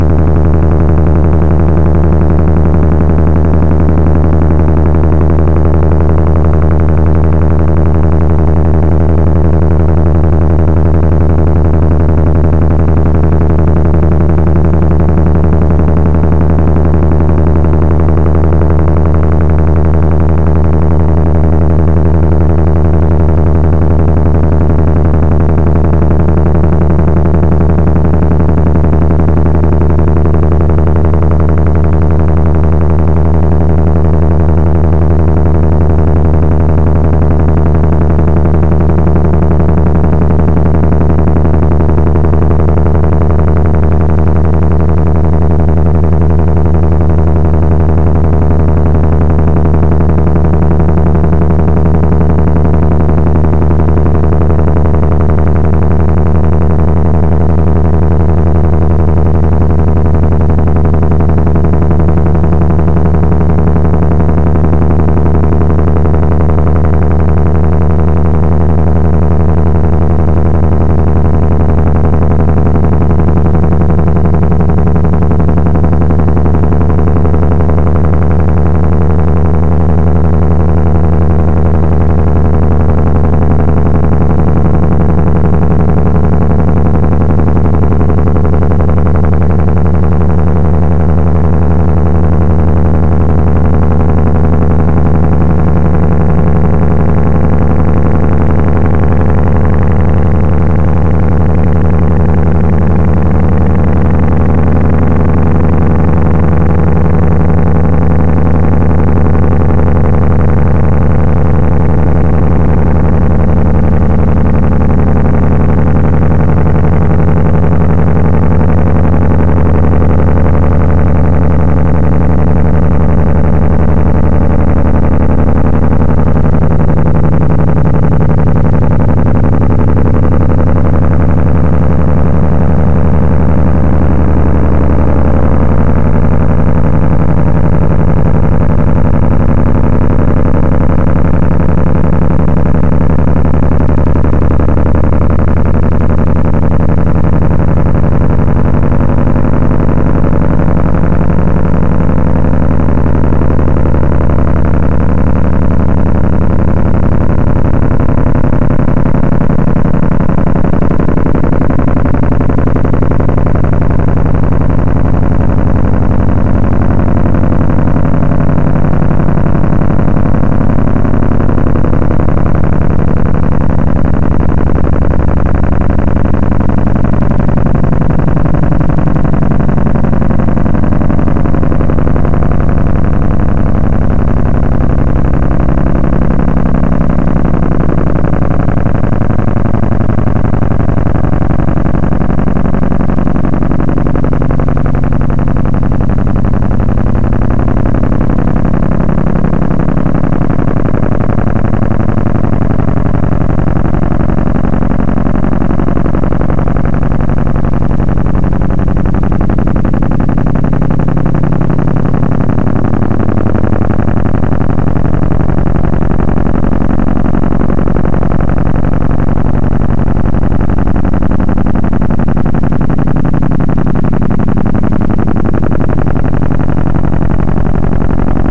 IMG 1371.JPG.tif.helicopter.boat.engine.something weird
A photo I took, slightly edited in GIMP to make it tileable, not too noisy and eliminating most clicks. Imported in Audacity as sound.
boat, engine, helicopter, image2wav, low-frequency